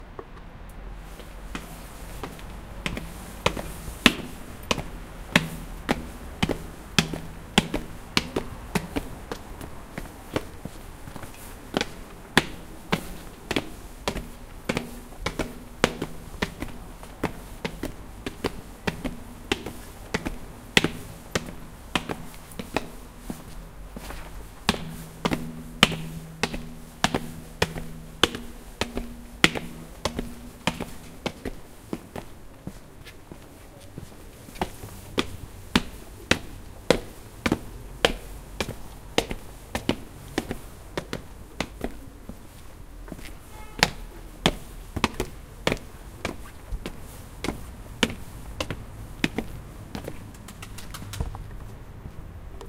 sound of steps on stairs in a street